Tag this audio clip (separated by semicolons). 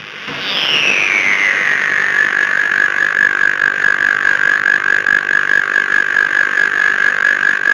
synth; drone